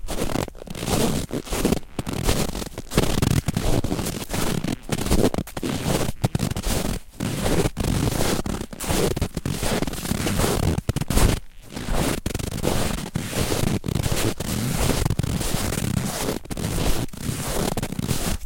fotsteg på hård snö 10
Footsteps in hard snow. Recorded with Zoom H4.
footsteps, snow